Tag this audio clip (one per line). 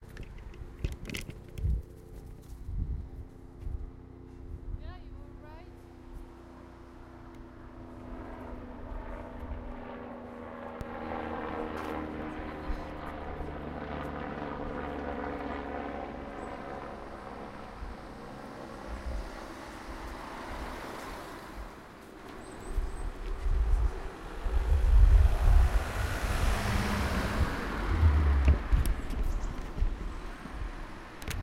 plane
field-recording
airplane
germany